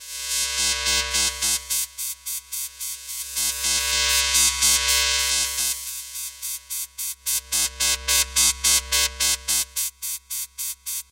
spacial communication sound with heavy lfo